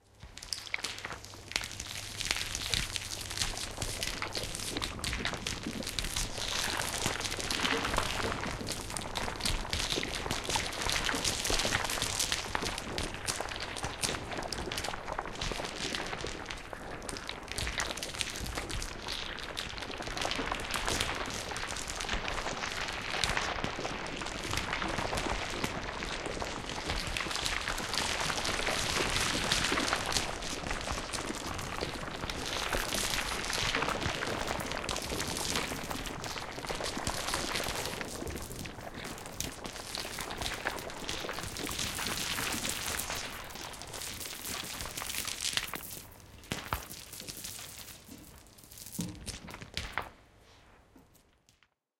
cauliflower compilation2a
The cauliflower samples from the vegetable store sample pack were compiled in a one minute arrangement. Some pitch-alterations (mainly lowering randomly per track) were added in busses for the broader sounds. Furthermore a reverb to juicy it up.
horror, dirth, vegetable, processed, raunched, filth, debris, water